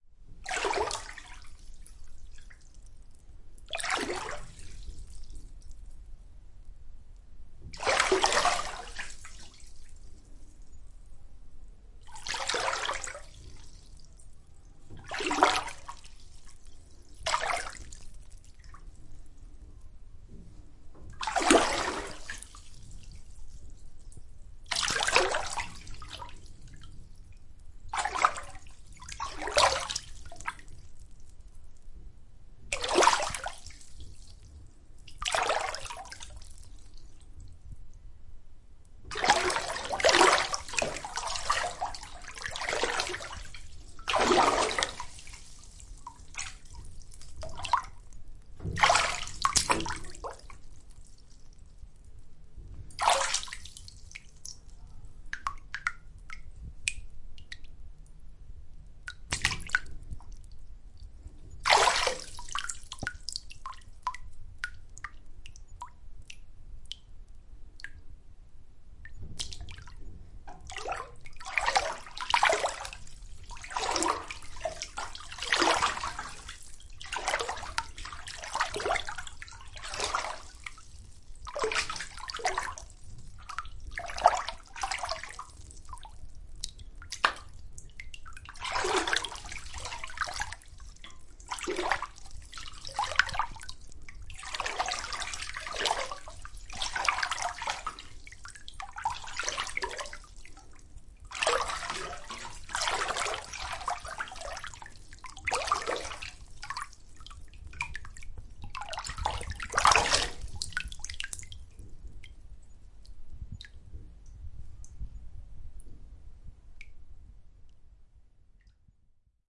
Wasser - Badewanne voll, Plätschern
Filled bathtub with splashing
bathtub, field-recording, filled, splashing